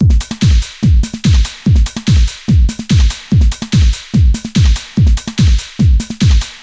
I decided to give you people a trance loop from one of my originals I'm currently making. Drums from a pack of percussion samples an online friend gave me, and put together and isolated in FL Studio 8.0.0